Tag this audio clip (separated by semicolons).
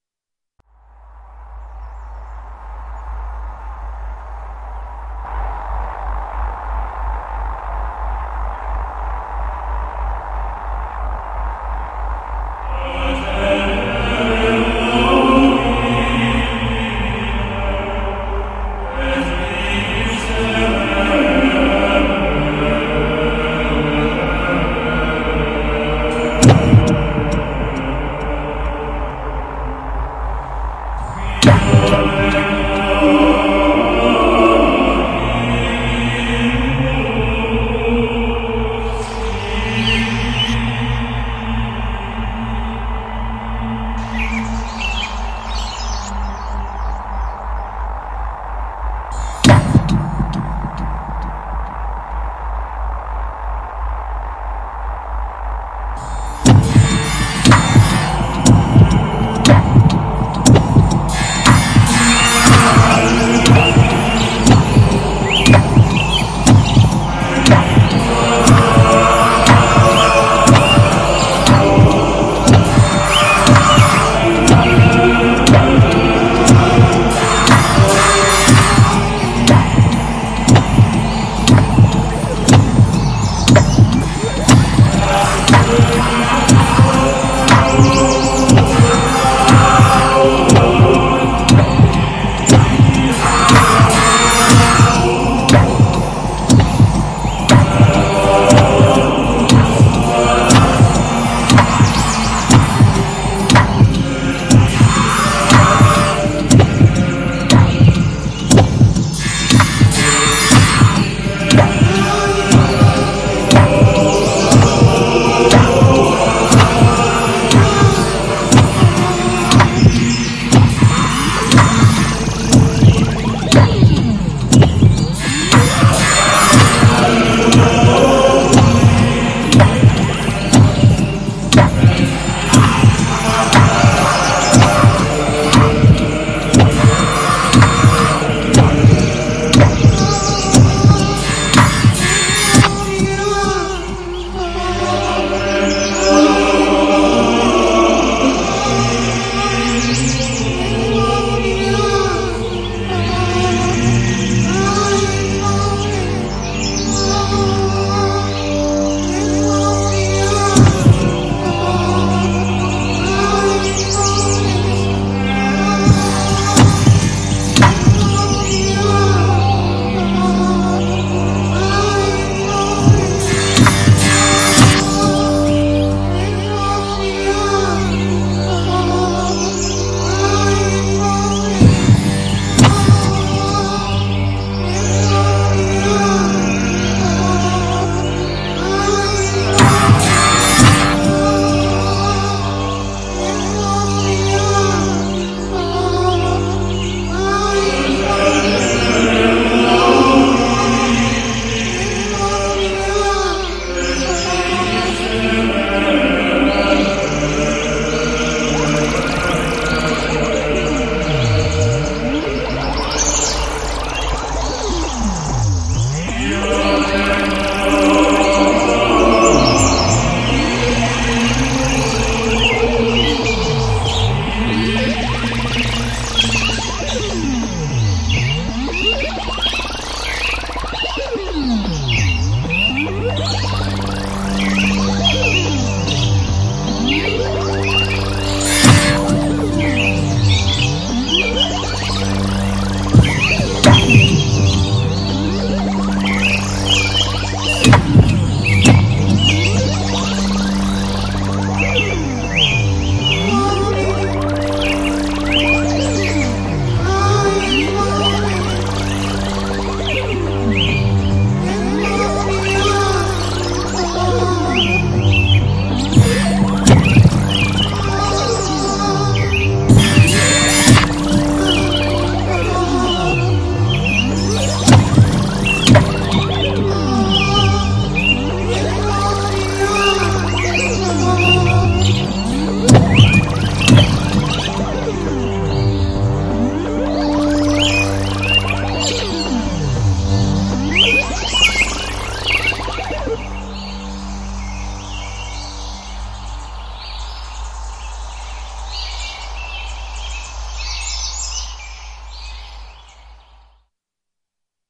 Noise; Scape; Drone; Sound